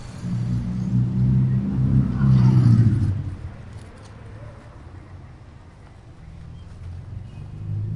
toma4 trasiciones espaciales3 liliana
Space transitions: spices from spaces in Bogotá